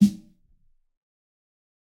fat snare of god 002
This is a realistic snare I've made mixing various sounds. This time it sounds fatter
drum, fat, god, kit, realistic, snare